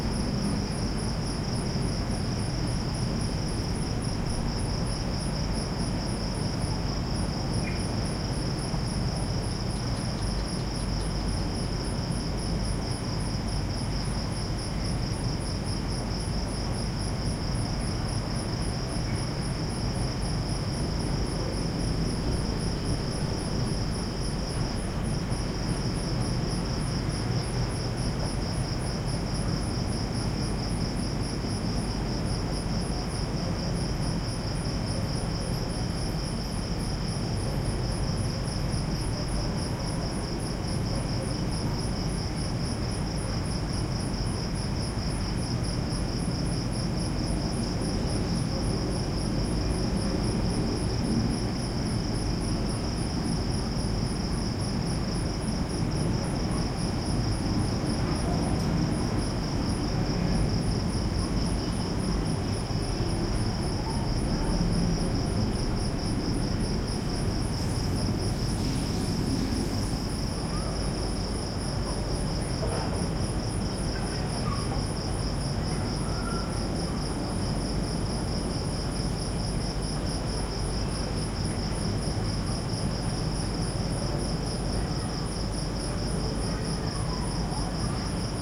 Thailand Kata small beach town skyline traffic haze from hilltop and children, with close heavy crickets
hilltop, small, Thailand, traffic